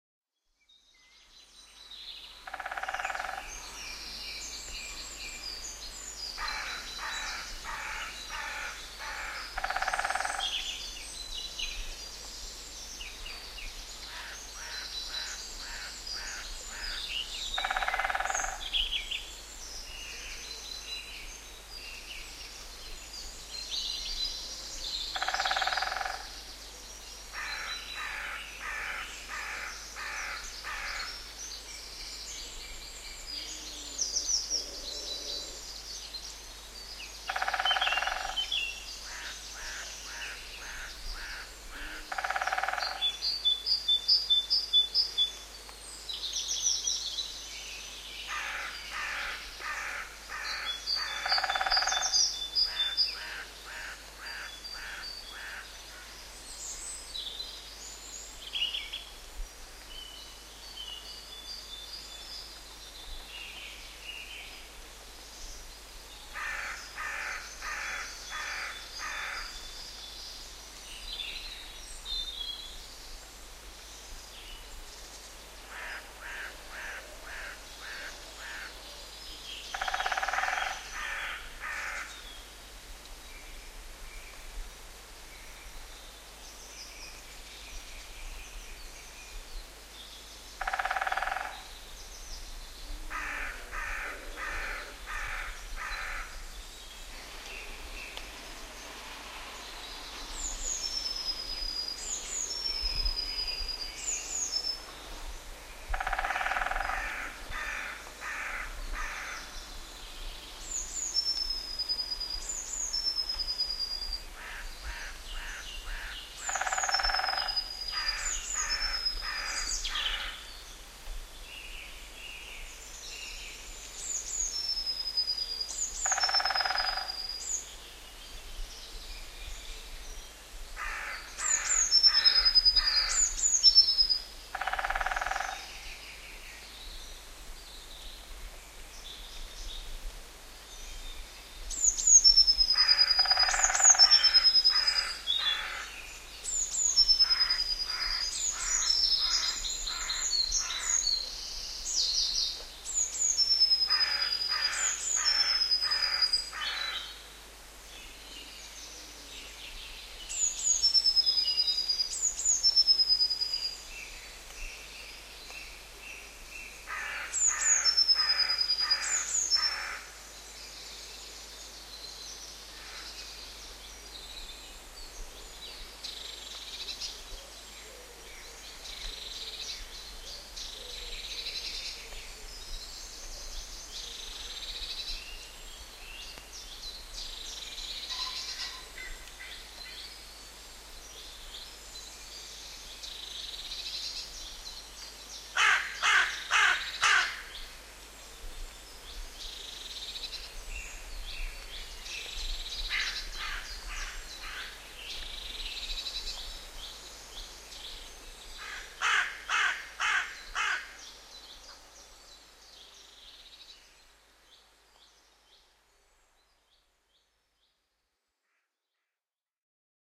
muchty birds2
The Sound of birdsong recorded in woodland near Auchtermuchty, Fife, Scotland.
The sound of a woodpecker can be heard along with crows, wood pigeons and various other birds
Recorded on a Sharp MD-SR40H mini disc with a Audio Technica ART25 stereo microphone